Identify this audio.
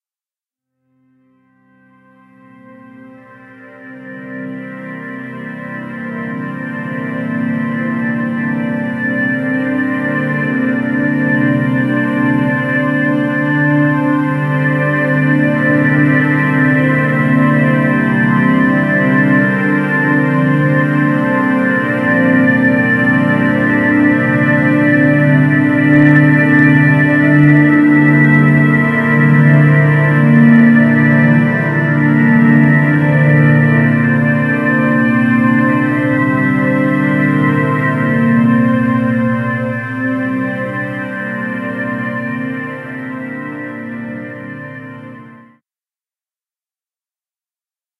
Some ambient pad made with flutes
Granular synthesis The Mangle